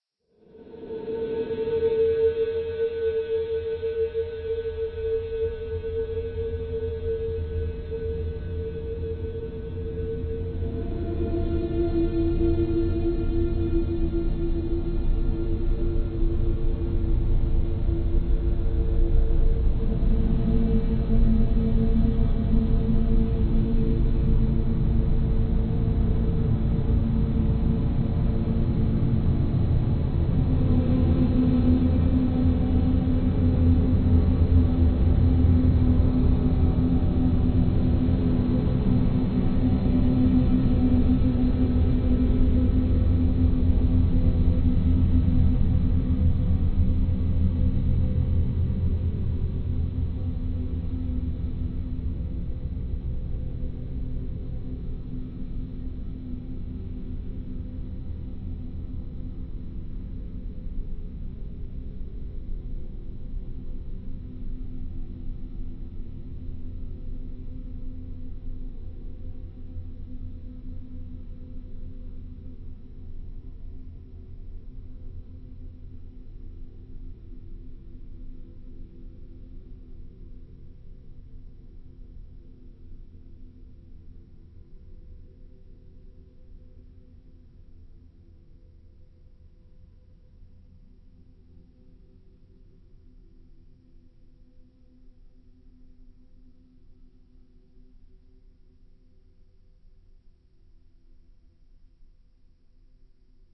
Scary WIndows XP shutdown
electronics, welcome-sound, Windows